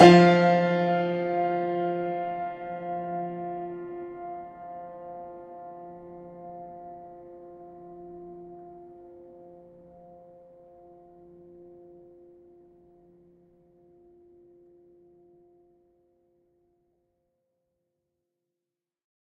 Grand Piano C (3 Octaves)
The sound of 3 octaves of a grand piano at the same time. Makes a mean lead synth when pitched up and down. Recorded with a zoom h2.
grand, keyboard, octaves, piano